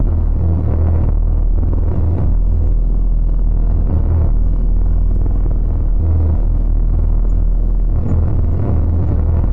Compressed Space Engine Sound SFX Synthesized
Engine, Jet, compressed